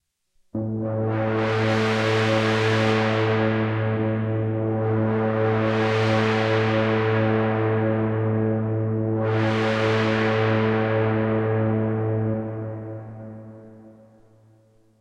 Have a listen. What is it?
analogue synth sweep A5
analogue-synth, sweep, sweeping, synthetisizer